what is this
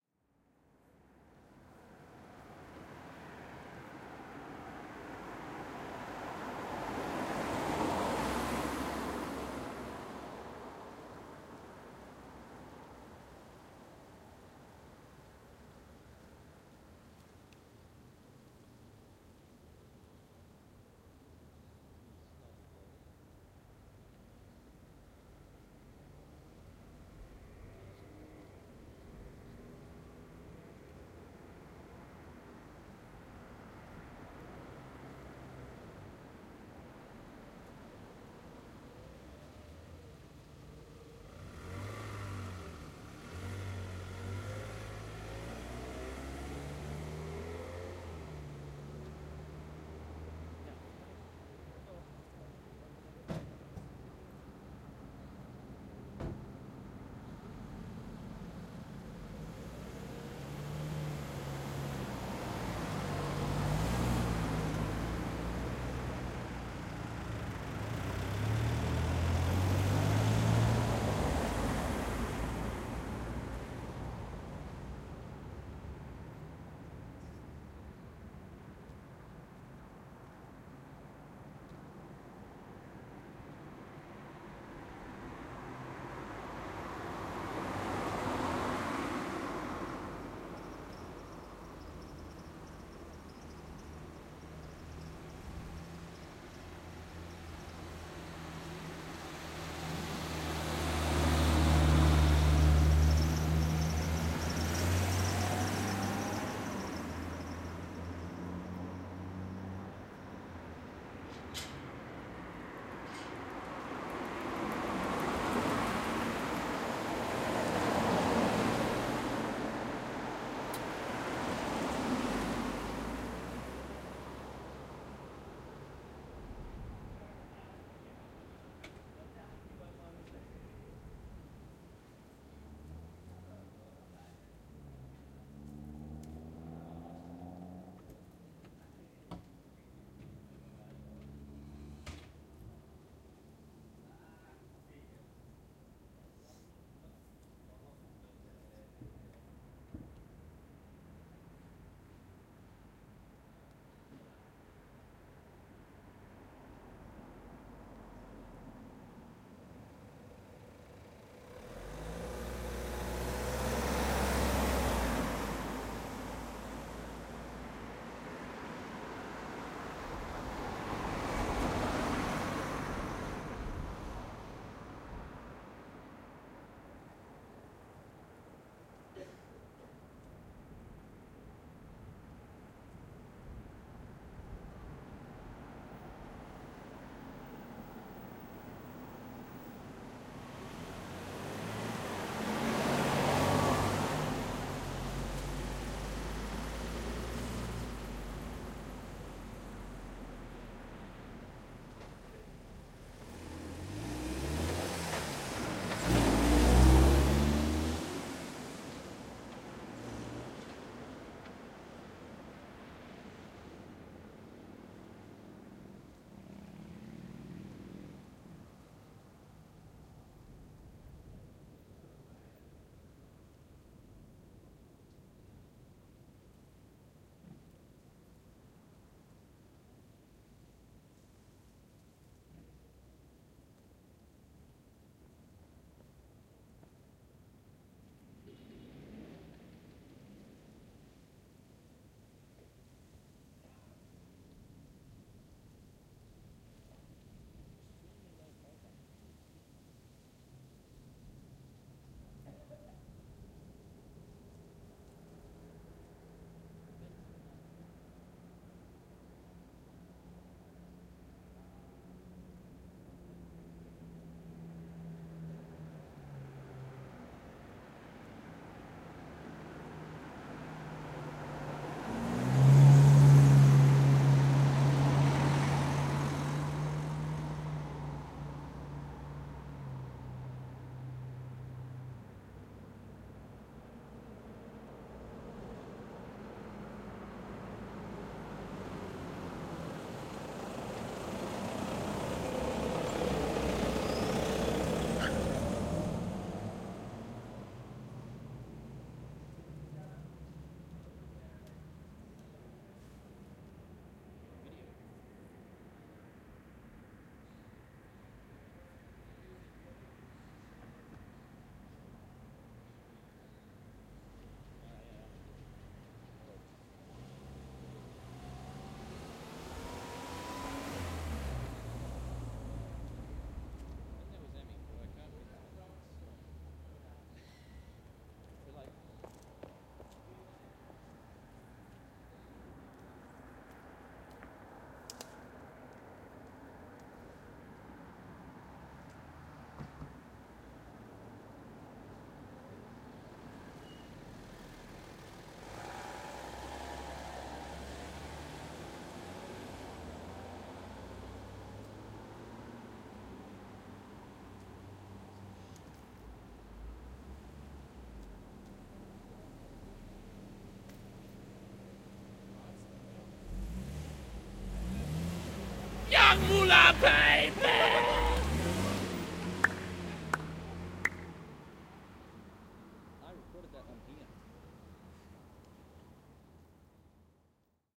quiet street
The sound of a quiet night time street in Caloundra, with a funny bit included near the end. Recorded using the Zoom H6 XY module.
traffic, suburb, quiet, road, night, car, street, cars, truck